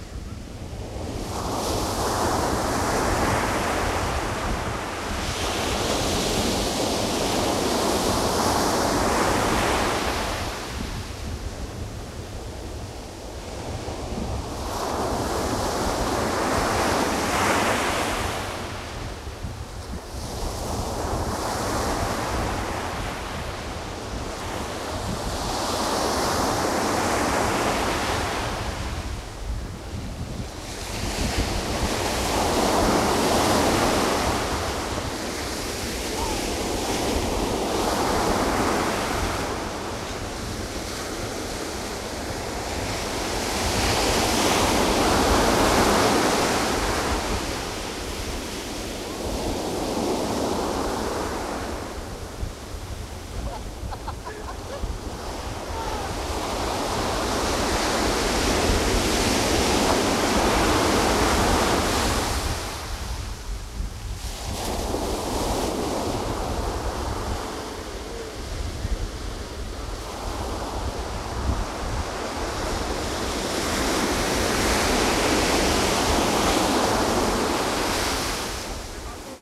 EndlessOcean-VeniceBeach-Loop
Venice Beach, California. The Pacific Ocean shortly before sundown. This sound can seamlessly loop. This was recorded in mono very close to the water with a dynamic microphone. See my other EndlessOcean file for waves more distant.
splashes, surf, sea, coast, ambient, ocean, shore, loop, nature, birds, seagulls, crest, waves, relaxing, sand, beach, mellow, spray, water, rumble, crashing